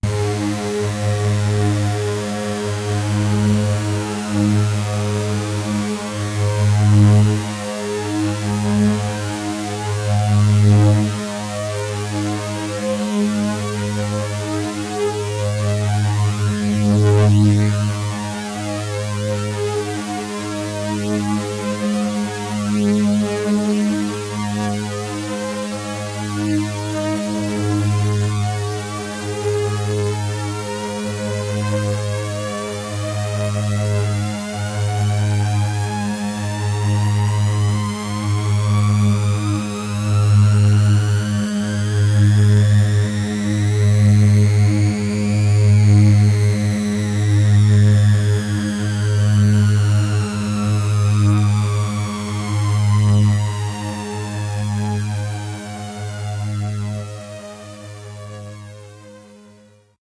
This is a saw wave sound from my Q Rack hardware synth with a low frequency filter modulation imposed on it. Since the frequency of the LFO is quite low, I had to create long samples to get a bit more than one complete cycle of the LFO. The sound is on the key in the name of the file. It is part of the "Q multi 004: saw LFO-ed filter sweep" sample pack.